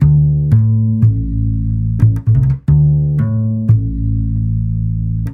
Jazz Bass A 2
jazz, music, jazzy